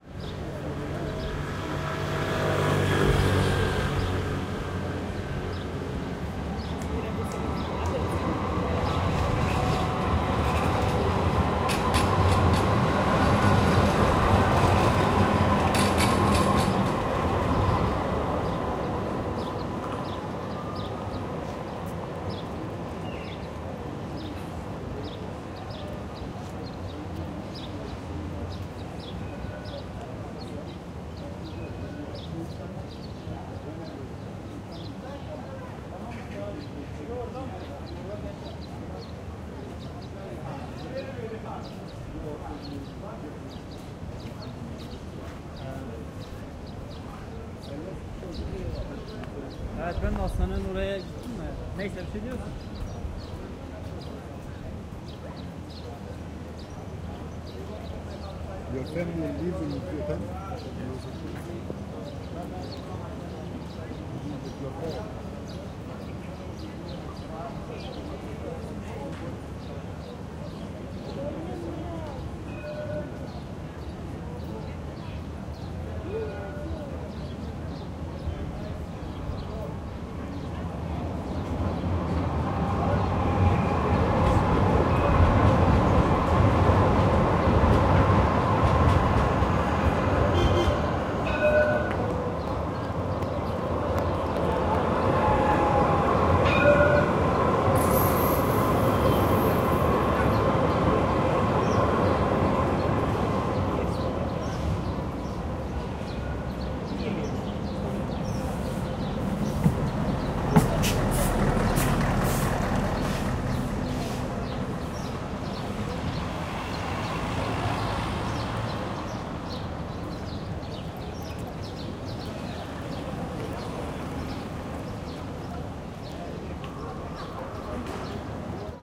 istanbul tram
On a street near Sultanahmet Square, the trams roll pass by, ringing bells to alert tourists of their presence. Recorded in June 2012 in Istanbul, Turkey using a Zoom H4. High-pass filter.